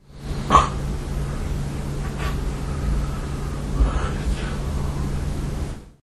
Moving while I sleep. I didn't switch off my Olympus WS-100 so it was recorded.
bed, body, breath, field-recording, household, human, lofi, nature, noise